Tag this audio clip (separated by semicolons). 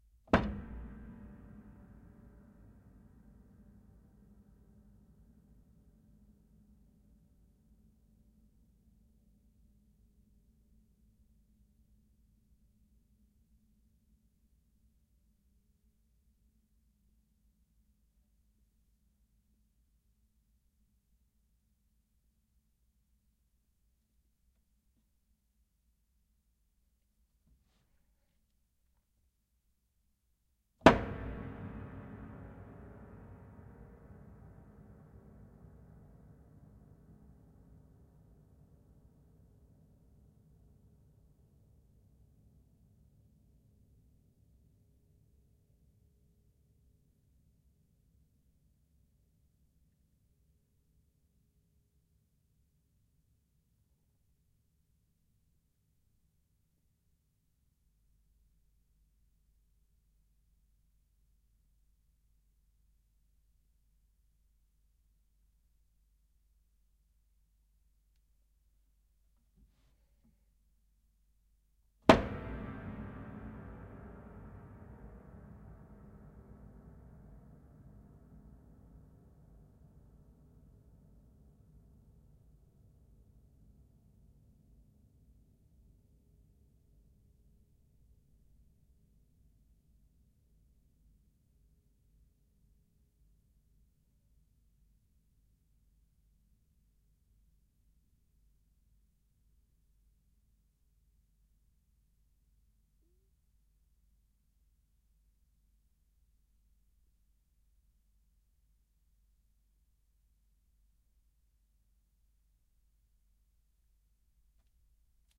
sustain; interior; piano; pedal; string